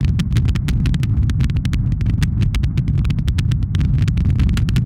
Sound of a large rocket exhaust. Synthesized using a bunch of effects on a sound of my espresso maker on a gas stove.
Simulating a rocket shortly after liftoff, in the lower atmosphere.
The sound is seemlessly loopable.
Cheers!